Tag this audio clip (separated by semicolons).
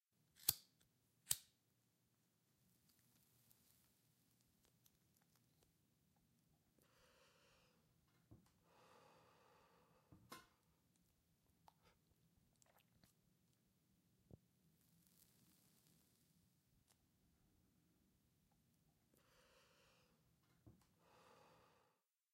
light; foley; SFX; smoke; smoking